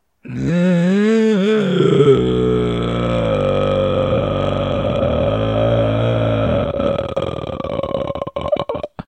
Weird Monster Noise
Monster, Noise, Weird